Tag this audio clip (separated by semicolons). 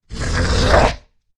roar; creepy; scary; beast; processed; creatures; noises; monster; creature; growls; beasts; growl; horror